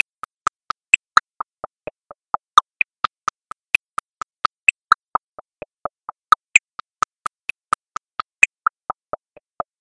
I made a click track sound. Then I increased the speed of sound and finally I added tremolo and Wahwah effects
sound, click, track